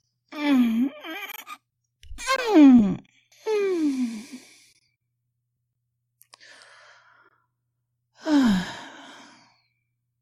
AS086508 joy
voice of user AS086508